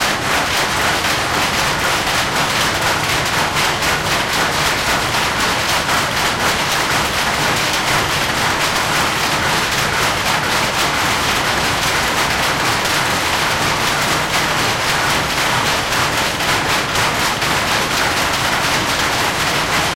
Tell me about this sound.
Bhagalpur, silk weaving power loom
In an Indian textile weaving mill at Bhagalpur, in Bihar state, we hear a power loom running, weaving silk fabric.
clatter, deafening, factory, field-recording, industrial, loom, machine, silk, textile, weaving